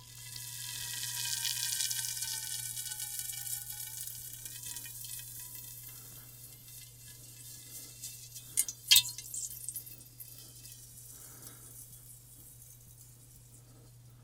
bubbles mono
a sound created from bubbles of sparkling wine
dźwięk stworzony z bąbelków musującego wina
ambience, ambient, atmosphere, atmospheric, bubbles, design, effect, experiment, experimental, sci-fi, sound, sound-design, soundscape, sparkling, wine, wine-glass